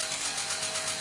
Great mechanical loop for game, movie, or music.
factory, industrial, loop, machine, machinery, mechanical, rithmic, robot, robotic